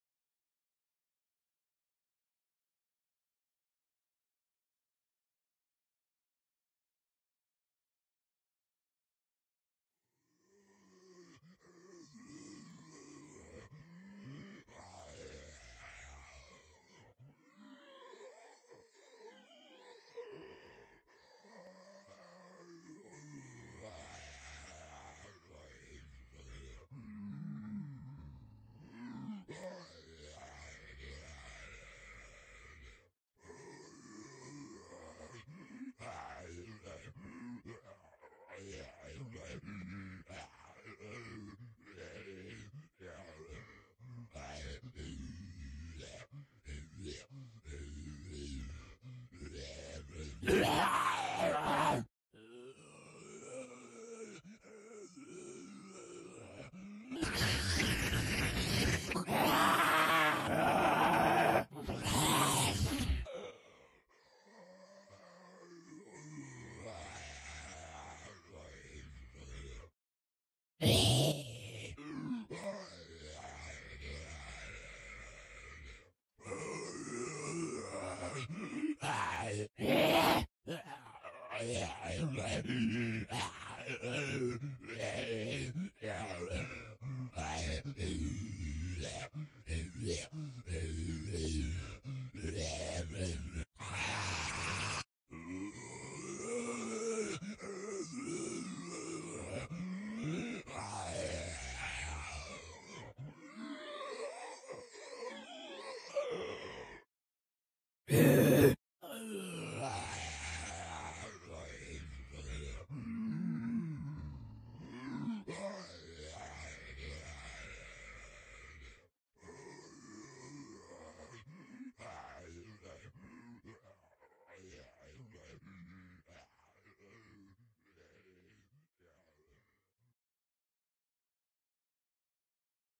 Solo Kyma Zombie 1
Single zombie vocalization, processed through pitch following and excitation via Symbolic Sound Kyma.
dead-season, ghoul, groan, kyma, moan, undead, zombie